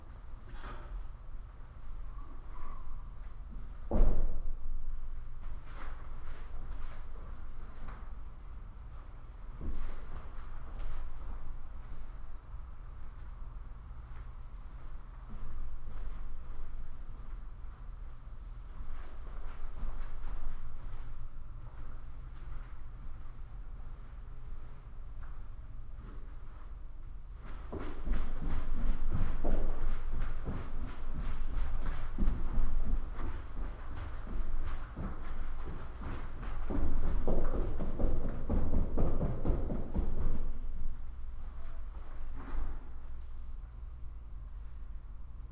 Muffled Steps On Carpet
muffled, Footsteps, Feet, shoe, Carpet, step, sneaker